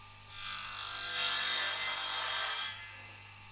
circ saw-01
Distant circular saw sound.
circular-saw, saw